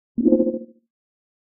UI 6 Confusion blip(Sytrus,arpegio,multiprocessing,rsmpl)
Sounding commands, select, actions, alarms, confirmations, etc. Created in a synthesizer SYTRUS with subsequent processing. Perhaps it will be useful for you.
I ask you, if possible, to help this wonderful site (not me) stay afloat and develop further.
alert, confirm, bleep, blip, typing, GUI, computer, fx, click, application, UI, effect, gadjet, game, film, screen, signal, select, sfx, alarm, menu, cinematic, command, switch, option, interface, beep, button, keystroke